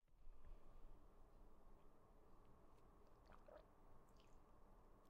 Sipping water
Drinking water. Recorded with H4N recorder in my dorm room.